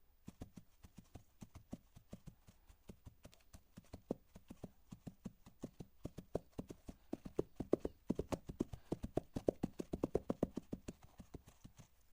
Hooves, Hard Muddy Surface / Layer 01
Microphone - Neumann U87 / Preamp - D&R / AD - MOTU
Coconut shells on a muddy, hard surface.
To be used as a part of a layer.
Layer, Cow, Horse, Hooves, Hoof, Layers, Group, Run, Buffalo